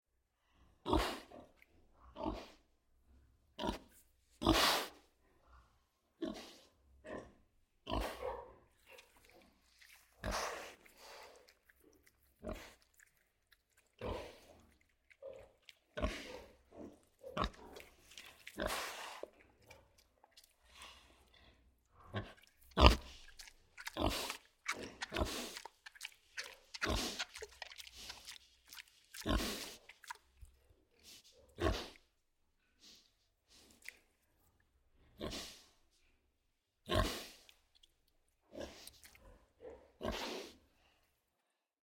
Big pig eating loudly in a barn, recorded at Kuhhorst, Germany, with a Senheiser shotgun mic (sorry, didn't take a look at the model) and an H4N Zoom recorder.
pig, barn, eating